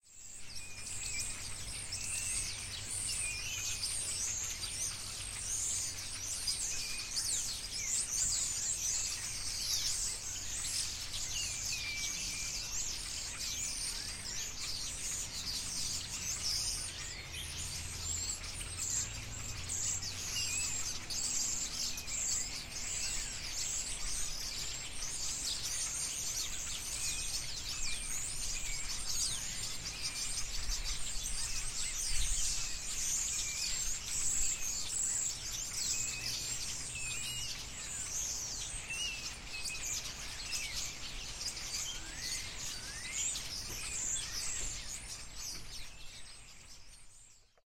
Birds in Tree
A whole flock of birds going nuts in the tree next to my house.
bird, birds, birdsong, field-recording, nature